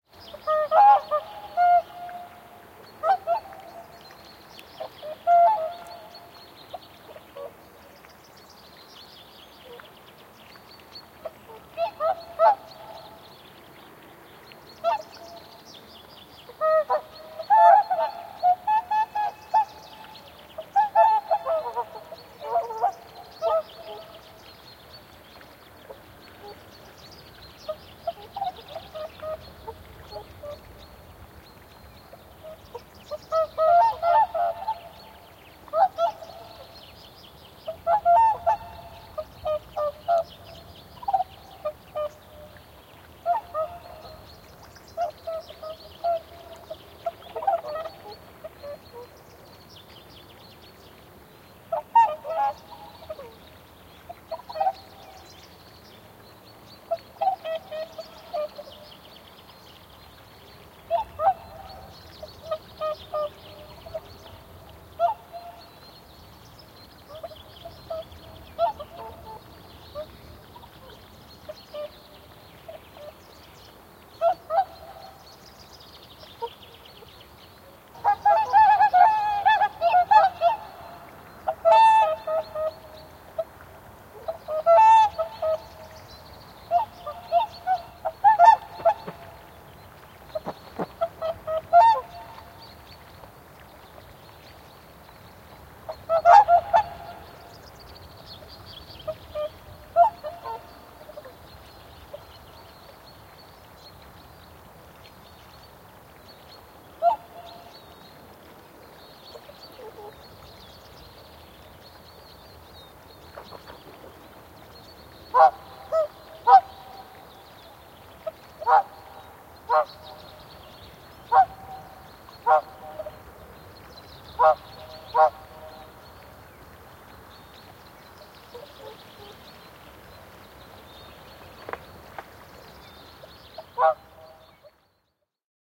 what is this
Laulujoutsen, joutsen, huutoja, kevät / A whooper, whooper swan, a few swans trumpeting on a pond in the spring, echo, small birds in the bg
Muutama joutsen toitottaa lammella keväällä, kaikua. Taustalla pikkulintuja.
Paikka/Place: Suomi / Finland / Lohja, Sitarla
Aika/Date: 10.04.1994
Suomi, Lintu, Linnut, Soundfx, Finnish-Broadcasting-Company, Nature, Birds, Yle, Tehosteet, Bird, Whooper, Swan, Field-Recording, Spring, Yleisradio, Call, Luonto, Finland